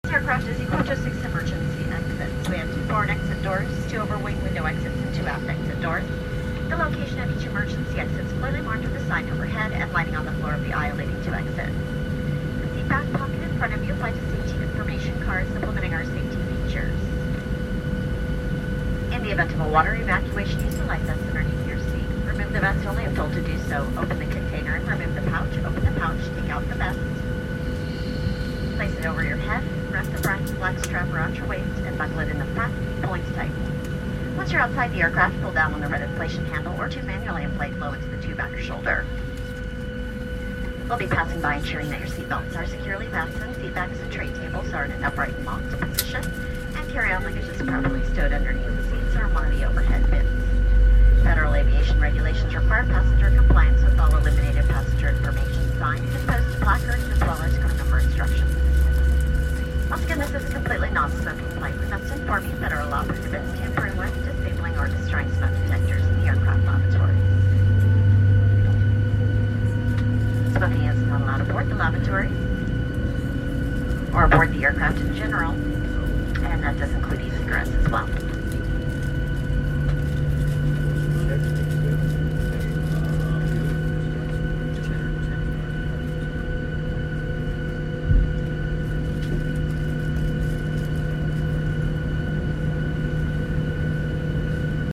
Joined in progress: Pre-flight instructions are given on a commercial flight.